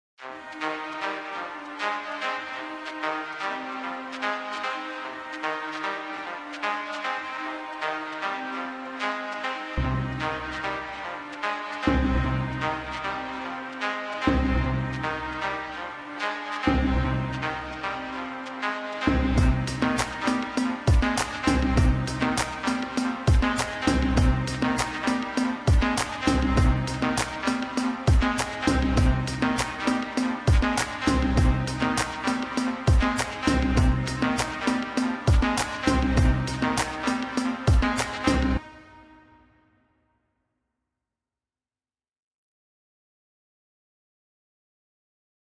triphop electro loop